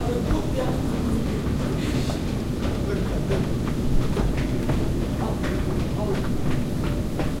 Field recording from Oslo Central Train station 22nd June 2008. Using Zoom H4 recorder with medium gain. Positioned near escalators. Trying to get recordings of Norwegian speech.
norwegian, oslo, train-station, norway, atmosphere